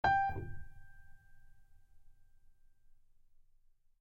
acoustic piano tone
acoustic, piano, realistic, wood